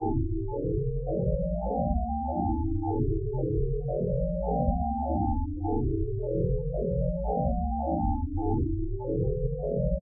I wanted to create some synth tracks based on ancient geometry patterns. I found numerous images of ancient patterns and cropped into linear strips to try and digitally create the sound of the culture that created them. I set the range of the frequencies based on intervals of 432 hz which is apparently some mystical frequency or some other new age mumbo jumbo.